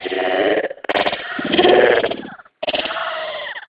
andrea bonin02
changer le tempo (46%), égalisation, phaser (4), wahwa, tempo
typologie: itération variée
morphologie: son complexe, groupe nodal, variation scalaire
grain de frottement, rugueux
allure vivante